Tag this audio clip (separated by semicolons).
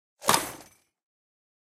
electronica; layered